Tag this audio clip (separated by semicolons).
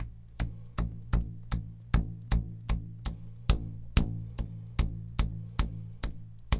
Band; Exercise; Plucking